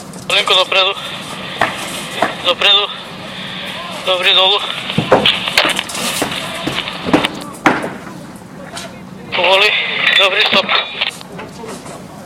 Navigace vysilackou
Navigating a crane with a walkie talkie
crane, navigation, talkie, walkie